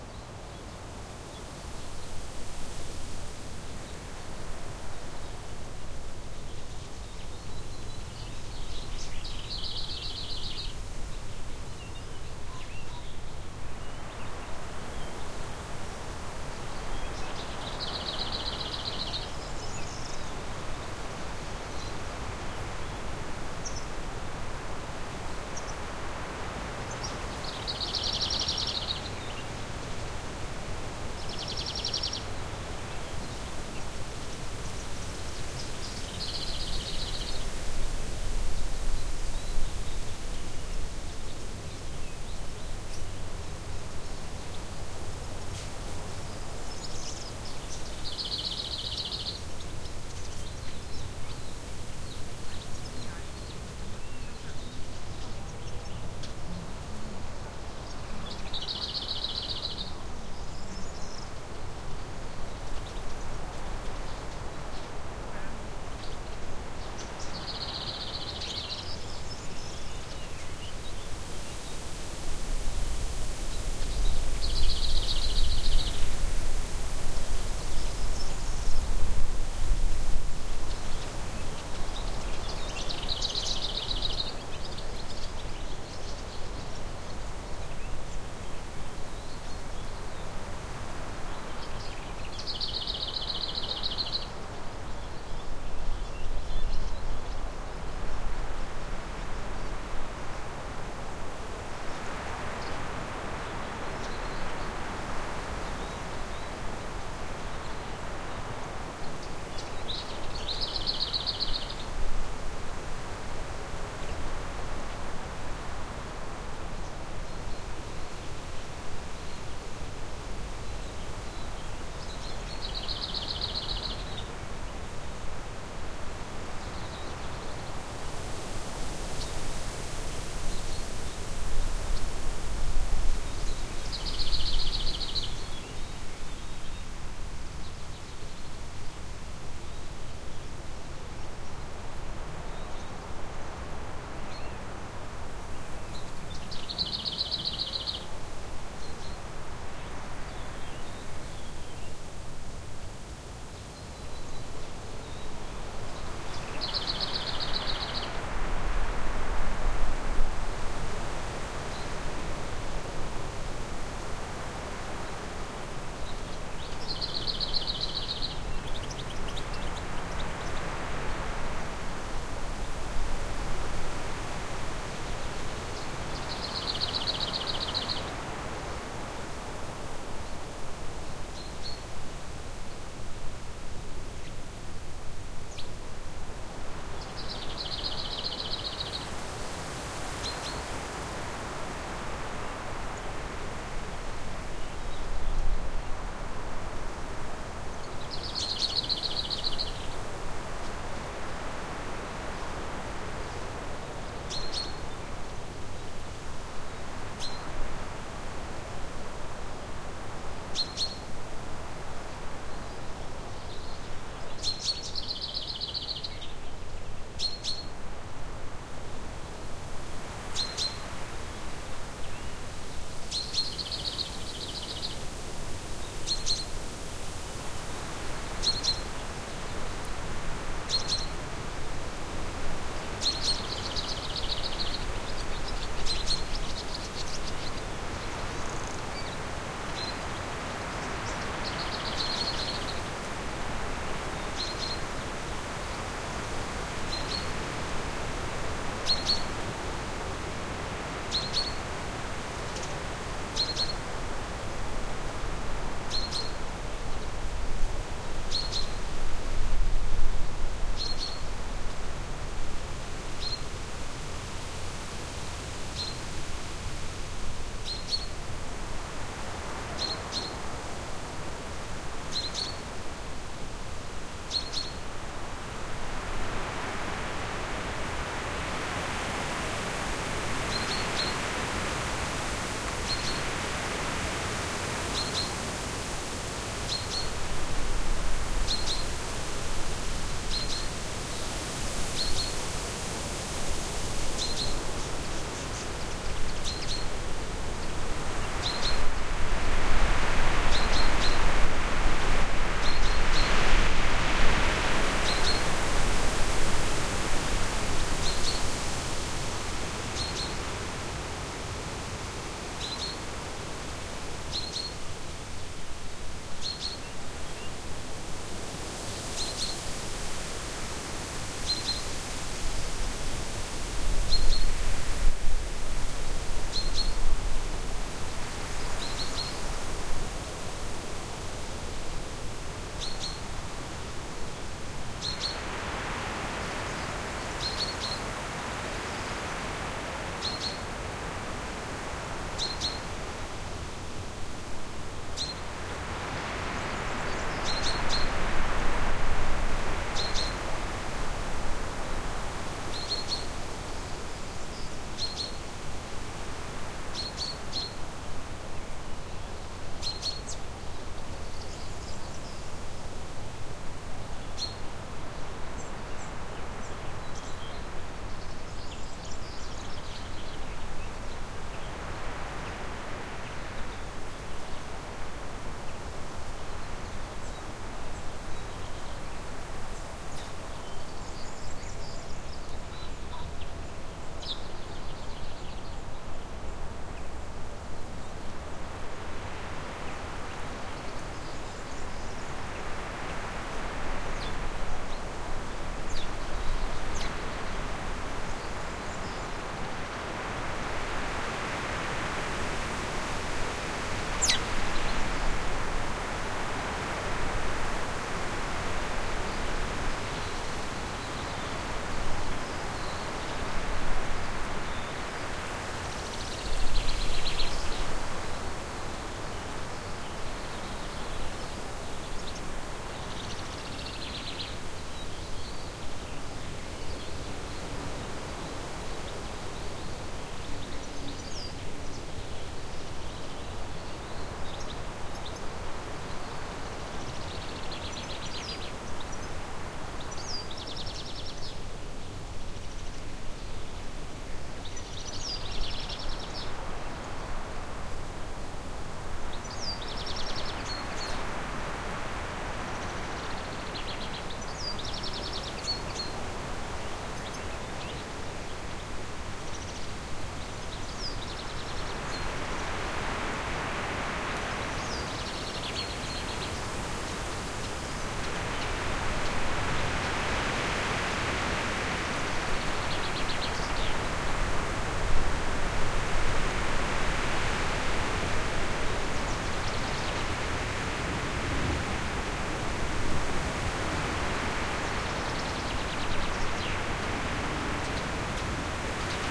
Wind in the larch tree
Some wind in a Larch tree plus some birdsong and at the beginning some distant voices. All that recorded in a garden near Ovtrup / Denmark. AT3031 microphones with windjammers, R-09HR recorder and FP-24 preamp.